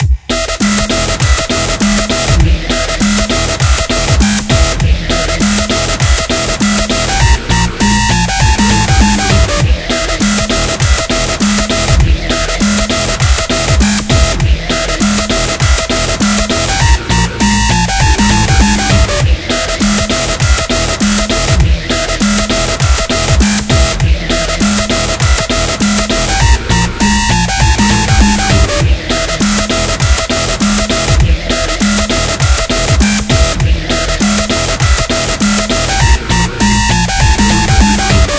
Electronic Dance loop. Loop was created by me with nothing but sequenced instruments within Logic Pro X.
dance, edm, electric, electronic, epic, intense, loop, loops, music, song